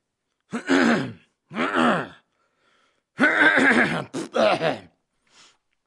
Man Clears Throat (plus raspberry & cough)
My buddy clears his throat 3 times, then farts and coughs. He does this before preparing to record lines for your movies usually.
blowing-raspberry, clearing-throat, clearing-your-throat, cough, coughing, fart, guy, hacking, human, male, man, mouth-fart, rasberry, sniff, sound, speech, spoken, throat-clear, vocal, voice